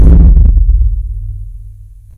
bass drum
Japan, bass, ancient, drum, Japanese, start, war